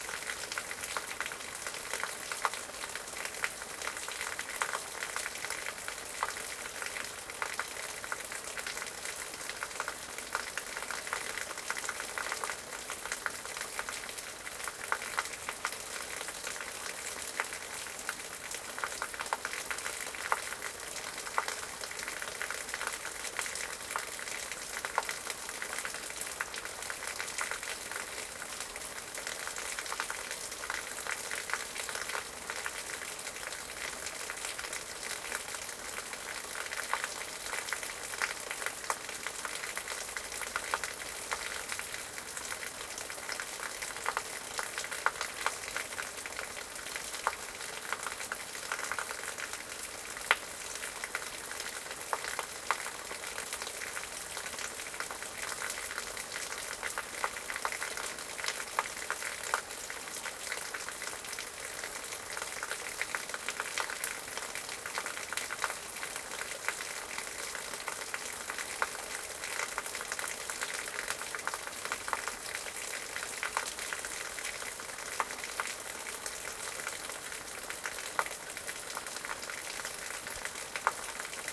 Water falling on stones in forest
You hear water falling from a metal drain onto stones and plants.
Recorded in a forest in autumn in Ticino (Tessin), Switzerland.